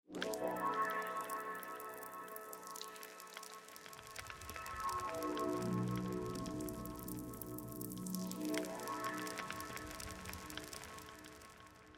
ab rain atmos
sweeping synth with added rain